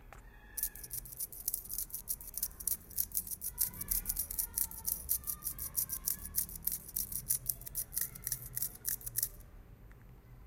mySound SASP 15
Sounds from objects that are beloved to the participant pupils at the Santa Anna school, Barcelona.
The source of the sounds has to be guessed, enjoy.
cityrings, spain, santa-anna